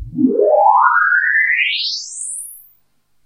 fast/sharp envelope with cutoff changes and high resonance synthesizer "effect" sound.